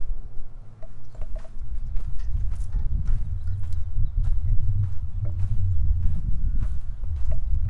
Walking Sand
walk, steps, walking, footsteps, footste